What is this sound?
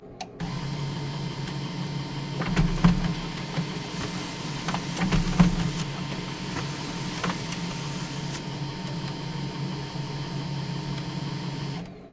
printerFax Print2pages
Office fax-printer print two pages. HP LaserJet 1536dnf MFP. Loud background noise is ventilation.
fax-printer, LaserJet, office, HP, HP-LaserJet, print, fax, printer